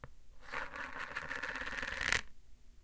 Plastic cup twirling
Twirling sound produced by tottering a plastic cap of a plastic container on the hardwood floor of the CCRMA recording studio. Recorded using a Roland Edirol at the recording studio in CCRMA at Stanford University.
plastic, ring, aip09, twirl, totter, cap